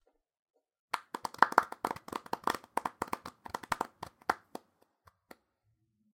This is a short section of a very small group of people clapping, either to suggest a very small group being polite, or an awkward and uncomfortably small reaction from a larger crowd. Recorded on Blue Snowball for The Super Legit Podcast, from layering a variety of self-recorded small bursts of slow applause.